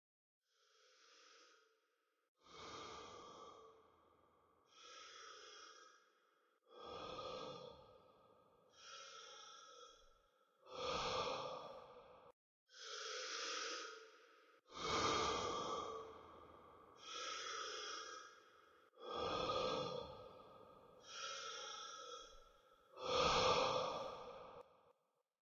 Ghostly breathing. Starts quiet, but gets progressively louder before suddenly stopping.
creepy, ghostly, breathing